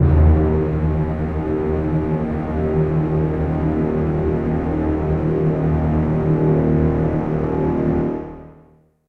Spook Orchestra D#1
Spook Orchestra [Instrument]